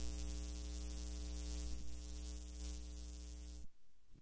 video game sounds games